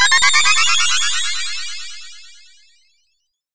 Sparkling Star 03
A star sparkling from far, far away. So far away in the vast universe.
This sound can for example be used in fantasy films, for example triggered when a star sparkles during night or when a fairy waves her magic wand - you name it!
If you enjoyed the sound, please STAR, COMMENT, SPREAD THE WORD!🗣 It really helps!